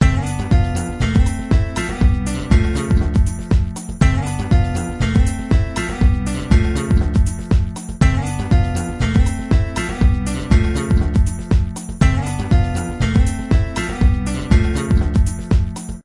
Game losing screen background music
This Audio track was created with Apple Garageband back in 2013.
It was part of a game I made for my bachelors thesis.